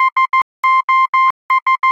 Morse SOS
Morse Code SOS distress beacon.